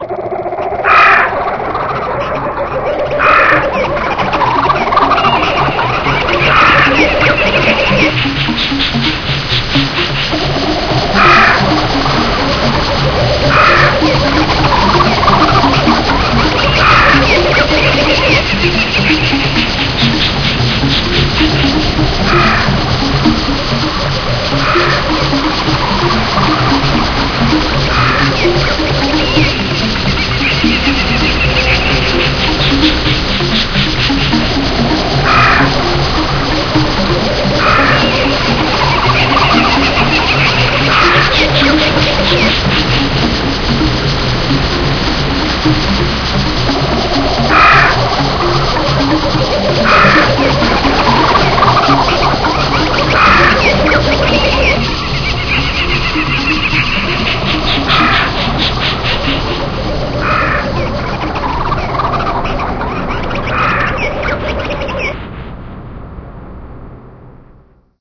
A jungle soundscape. Music that evokes a jungle feel can be heard in the background. Enjoy!